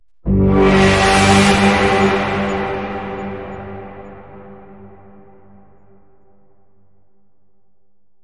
Entirely made with a synth and post-processing fx.